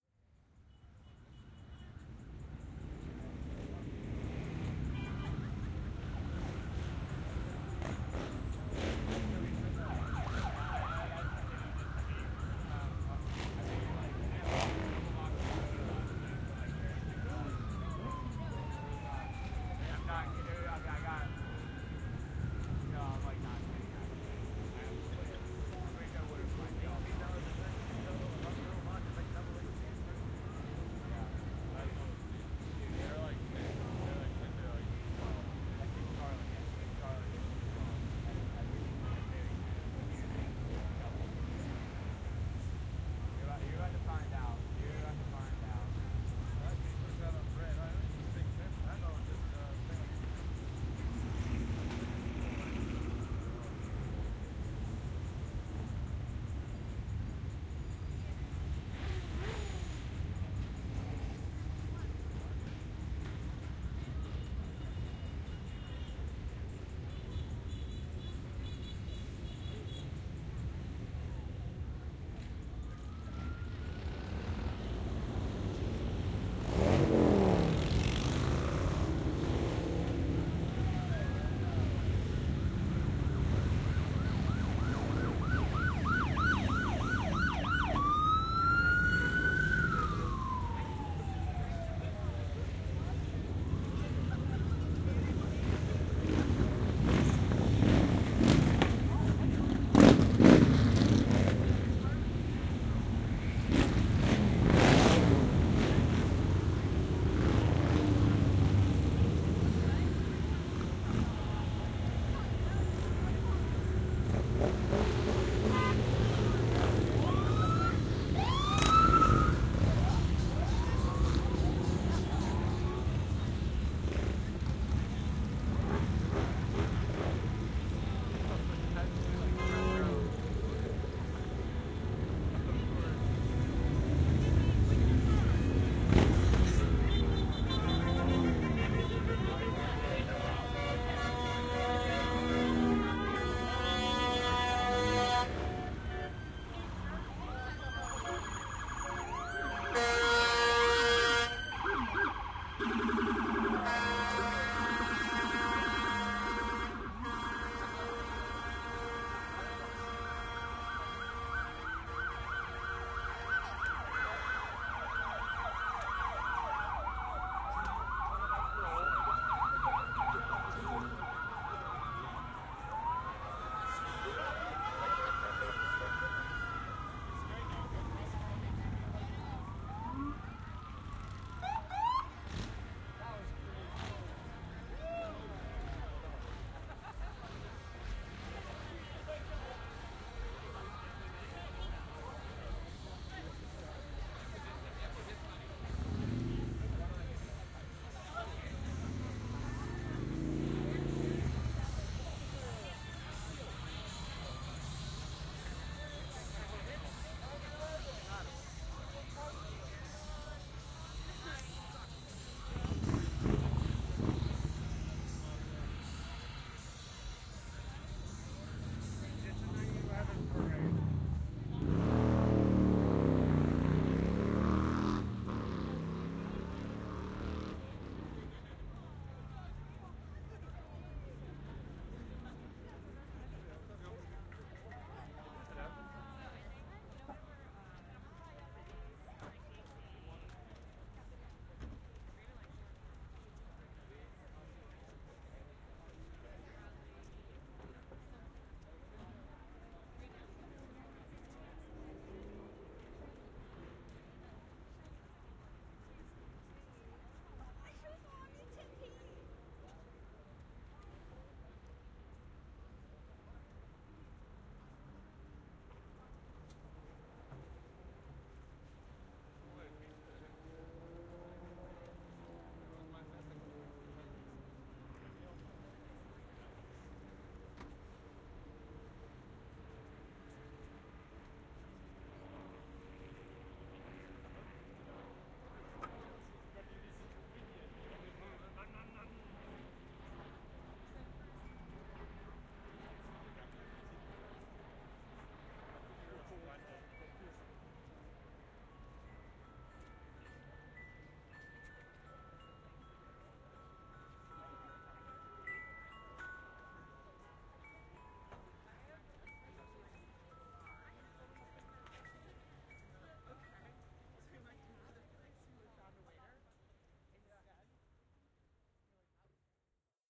over 3 minutes of loud motorcycles streaming by revving their engines plus sirens and horns during an insane motorcade of bikes and choppers that temporarily took over streets of the Westside Highway. Sirens are not police but are from bikes in the motorcade. Includes people talking and crowd noise in the background, some distant helicopter and followed up by some very relaxing chimes in the park that's adjacent the Highway. Recorded September 2022.
This recording was done with binaural mics, and will sound best on headphones.
File was edited for fade in/out, hi pass filter